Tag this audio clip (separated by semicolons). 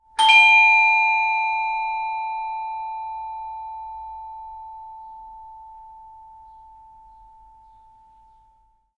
bell; doorbell